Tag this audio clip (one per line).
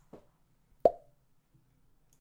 poping
poppingbubble
poppy
Pop
bubble